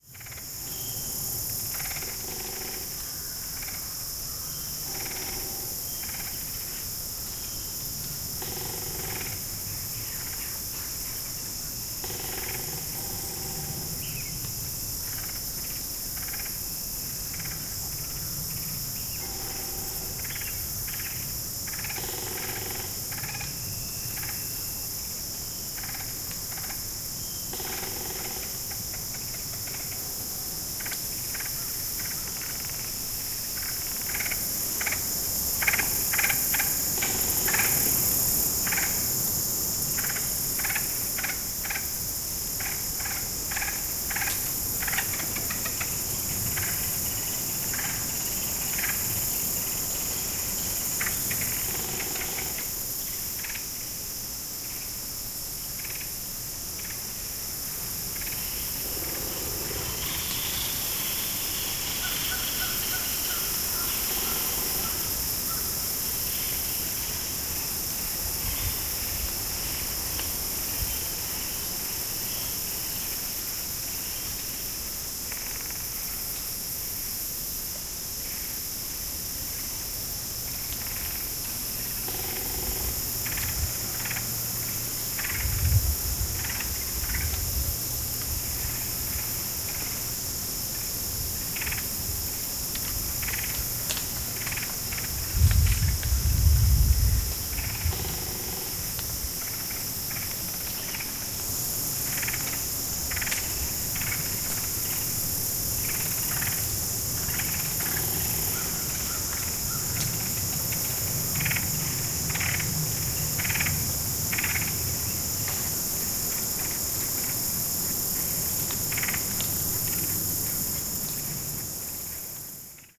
Oakwood swamp autumn boardwalk ambiance SEPTEMBER 23 2022
Here is a recording taken from a boardwalk running through a swamp in Jackson county Illinois. Recorded on Friday September 23 2022 as the season of autumn begins to stir. By now the neo-tropical warblers and other migrants are gone. Leaving the woodpeckers and blue jays and crows. A reflective ambiance of a now dry swamp, slowing down awaiting the arrival of full-on autumn, then winter.
You hear the wind sifting through the branches of the oaks, and hickories and maples, the chattering of woodpeckers, and, since this is the time of year of the harvest, you hear the often dropping of acorns, and hickory nuts, and pieces of hickory nuts and acorns, as the squirrels 30 feet above the swamp get sloppy with their lunch.
Equipment: Zoom F4
Microphones: Neumann KM 184
Rode NT5
Sennheiser MKH 8070
Autumn, swamp, melancholy, nature